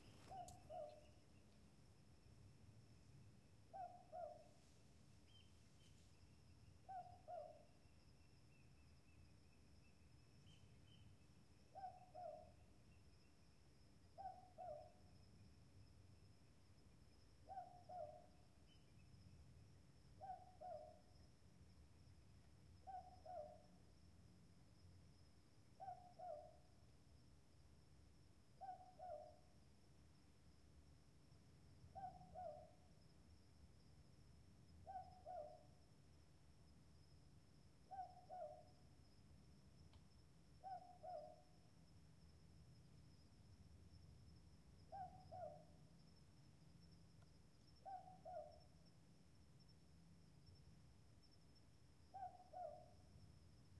Field recording of Southern Boobook owl, also known as a Mopoke in Port Stephens Australia. Regular two-note calls that sound like "boo-book". Dovelike sound. Recorded using Zoom H2